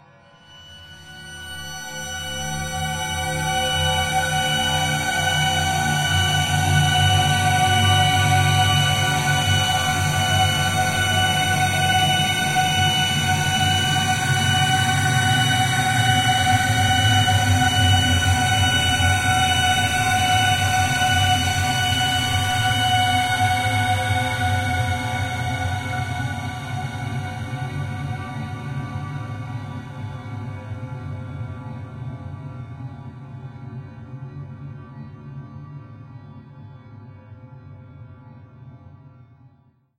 LAYERS 022 - Giant Dust Particle Drone-91
LAYERS 022 - Giant Dust Particle Drone is an extensive multisample packages where all the keys of the keyboard were sampled totalling 128 samples. Also normalisation was applied to each sample. I layered the following: a soundscape created with NI Absynth 5, a high frequency resonance from NI FM8, another self recorded soundscape edited within NI Kontakt and a synth sound from Camel Alchemy. All sounds were self created and convoluted in several ways (separately and mixed down). The result is a dusty cinematic soundscape from outer space. Very suitable for soundtracks or installations.
multisample, pad, soundscape, dusty, space, cinimatic